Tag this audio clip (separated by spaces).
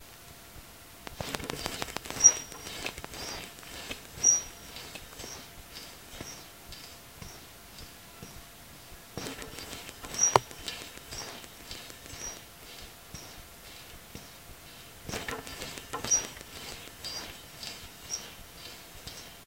esmuc garbage pipe rubbish trash